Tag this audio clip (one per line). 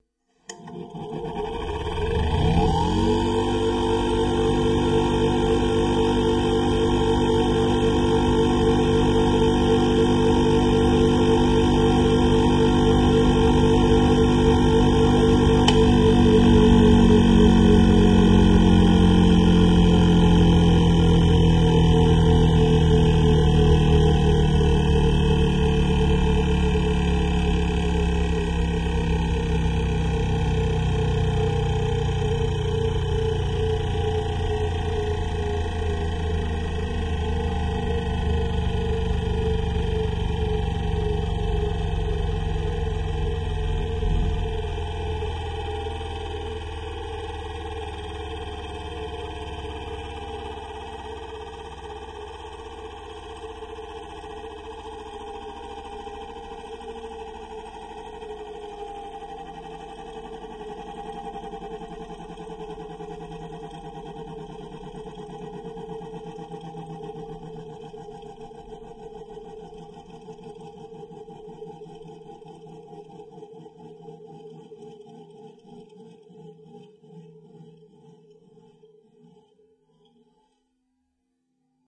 tools
grinder
sound-effects
mechanical
machine